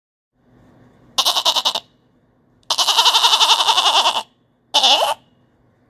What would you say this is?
This is a very happy goat. Enjoy 😊
barn, farm